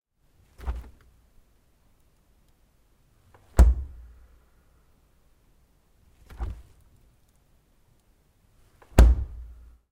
Fridge door1
Fridge door opening-closing
Zoom H6 recording